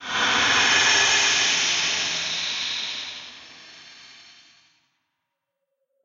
Alien Welding 3
Bzzt! Bzzt! Construction worker Zorbex welds away at a massive steel alloy beam. Yeah, that's pretty much it. If this describes your sound needs you've found the perfect sound! Made by paulstreching my voice.
weld, sci-fi, arc, construction, factory, welding, alien, metal, science-fiction, welder, spark, zap, industrial